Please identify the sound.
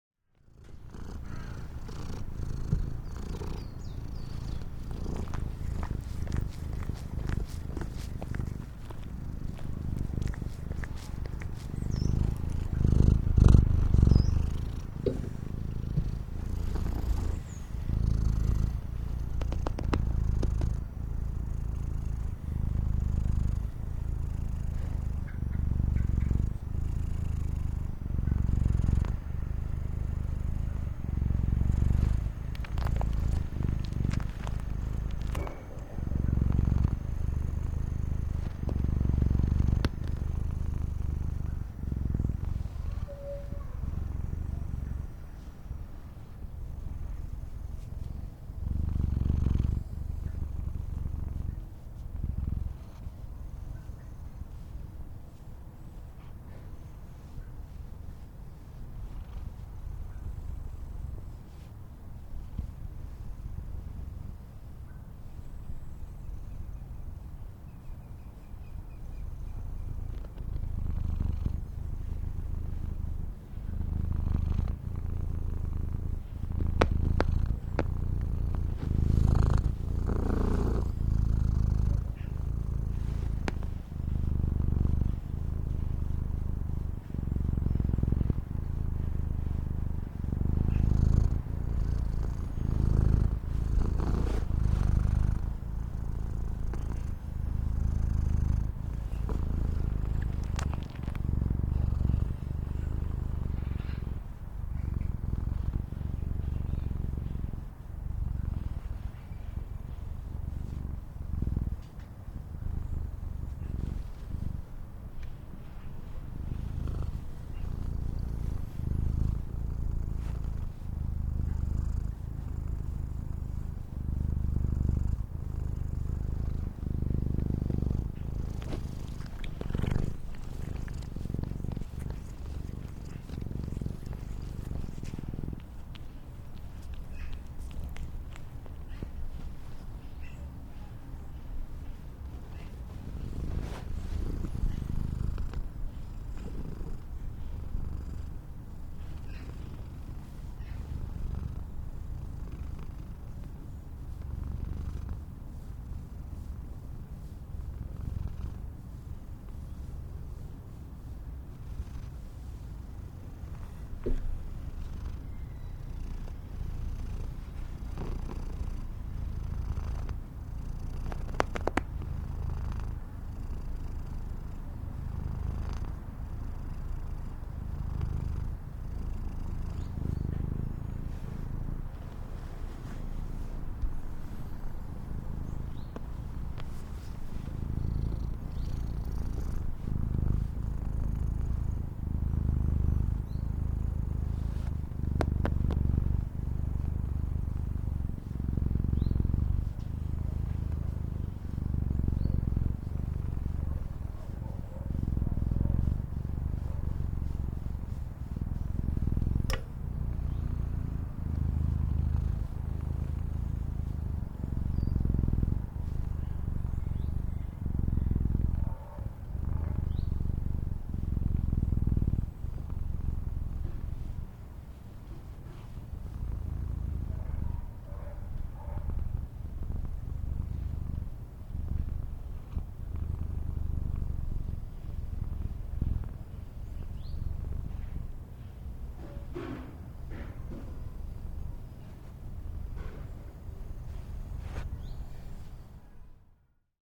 Soothing cat purr when the cat sits on my lap and is petted. Outdoor in the garden in rural environment. In the Background you can hear a raven at some point. Europa/Germany/Bavaria/Munich.
pet, animal, rural, cat, kitten, pets, purring, field-recording, purr, kitty